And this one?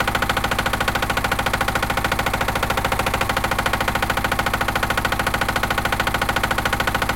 Vehicle Motor Pump Idle Engine Stereo 02
Motor Pump - Idle - Loop.
Gear: Tascam DR-05.
car, engine, generator, idle, industrial, loop, machine, machinery, mechanical, motor, power, pump